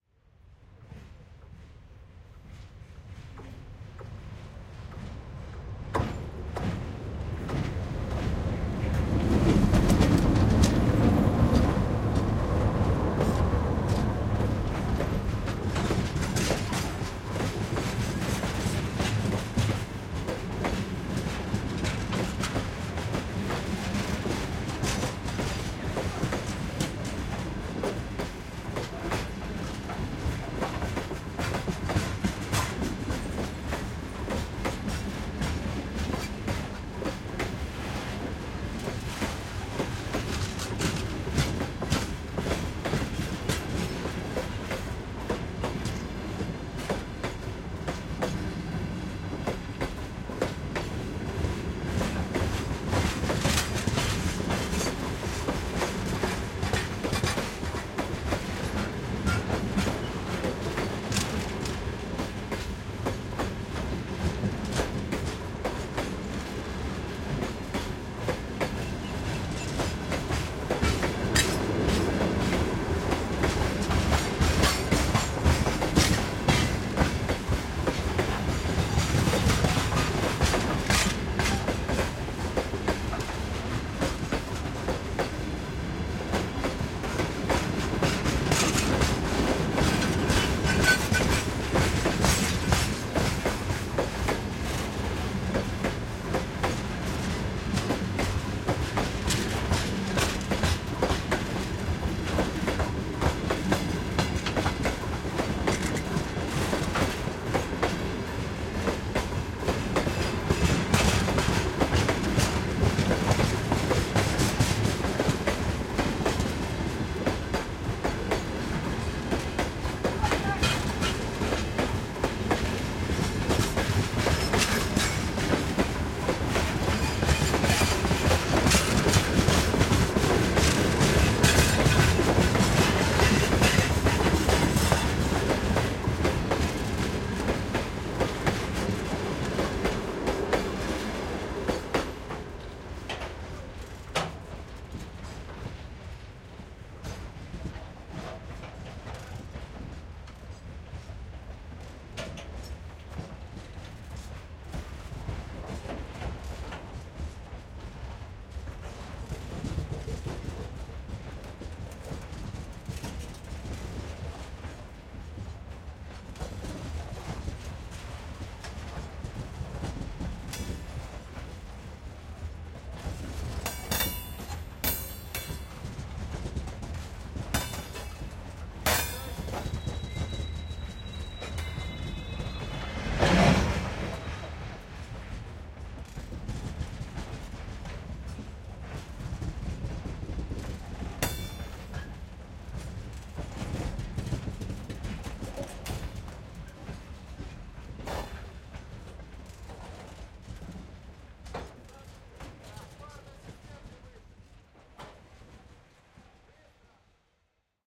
freight train at low speed passes
Ukraine, Kiev, writing in the M-S